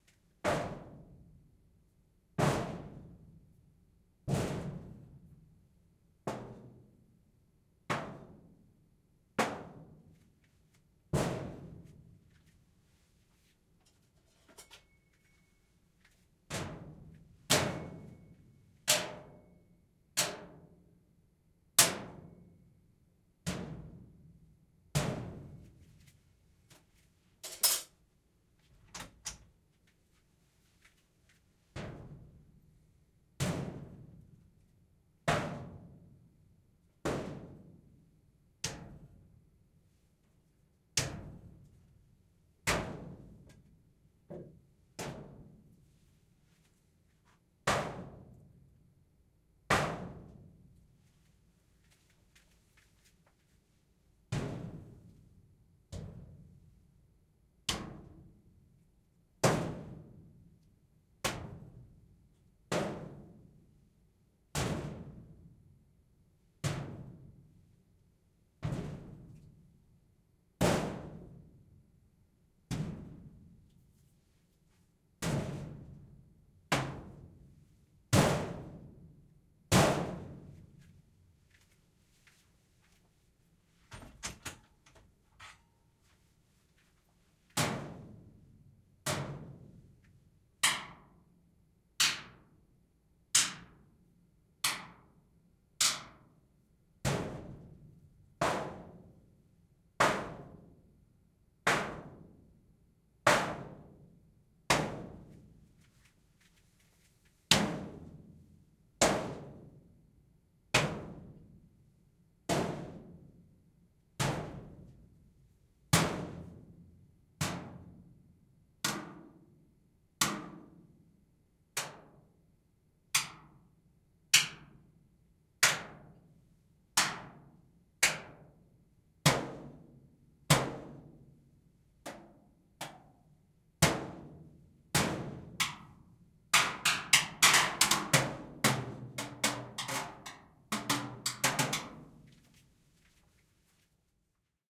Hitting a metal vent with various objects in various places.
impact, percussion, vent